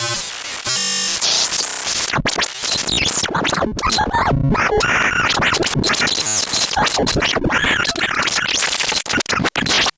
why you should invest in a Kaoss pad
Freya a speak and math. Some hardware processing.
circuit-bent, glitch, speak-and-math